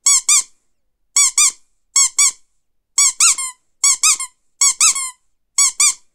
rubber duck big 2
duck, rubber, squeek